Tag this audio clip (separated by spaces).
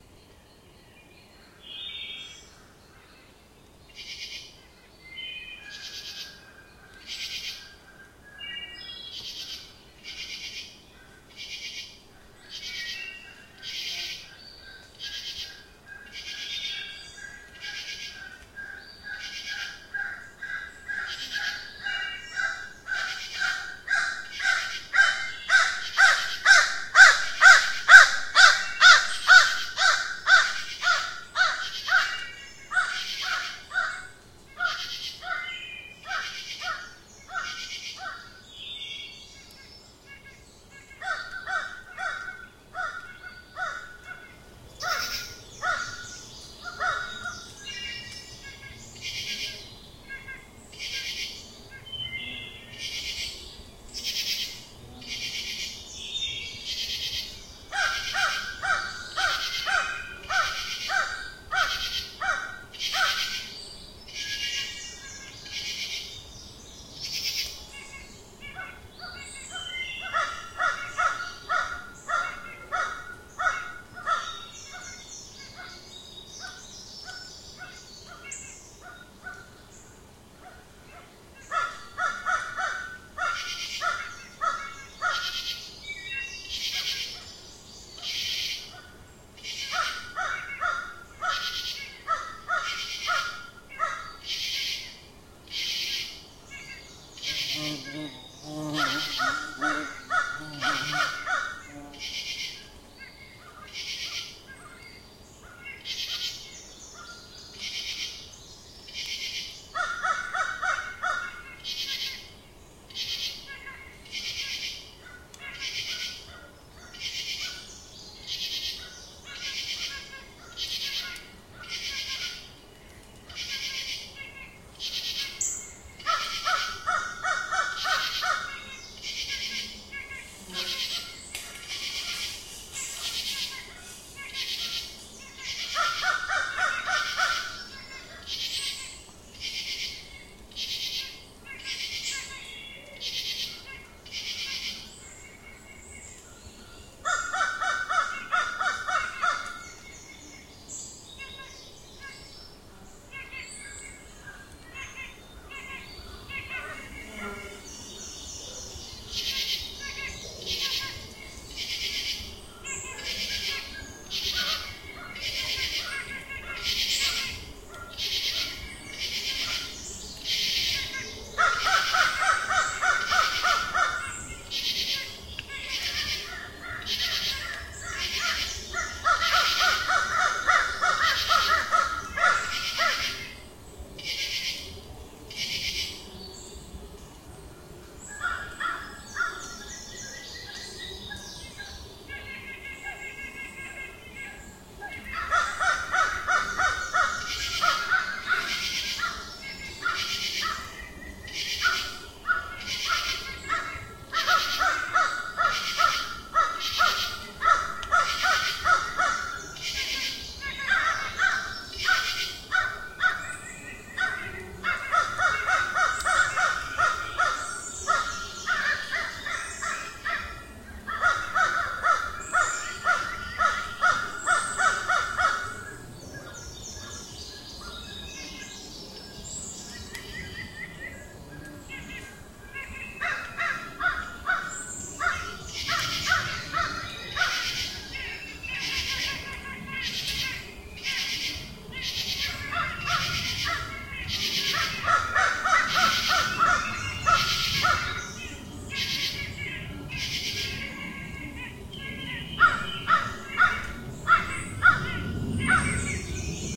birds georgia insects morning north-georgia summer trees woods